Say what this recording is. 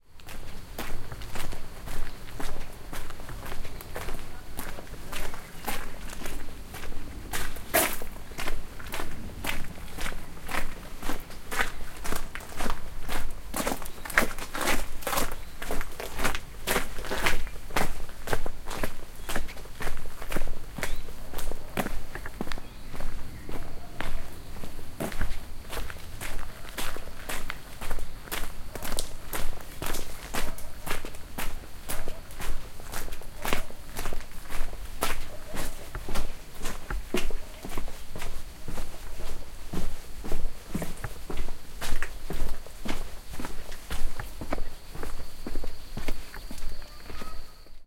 Son de pas dans des graviers. Son enregistré avec un ZOOM H4N Pro et une bonnette Rycote Mini Wind Screen.
Sound of footstep in gravel. Sound recorded with a ZOOM H4N Pro and a Rycote Mini Wind Screen.